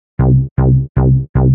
big bass sound I use quite often for my hard trance tracks
bass, dance, delay